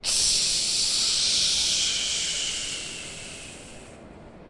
AmCS JH TI26 tsjsjsjsjsjsjs
Sound collected at Amsterdam Central Station as part of the Genetic Choir's Loop-Copy-Mutate project
Amsterdam, Central-Station